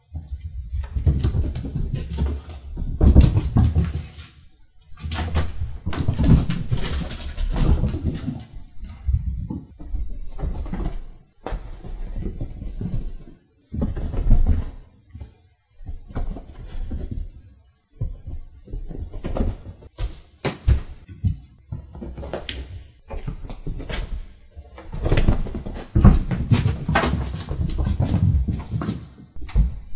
My cat is crazy again! She is running in various directions on a sofa and on a carpet and on a parquet.
Recorded by Sony Xperia C5305.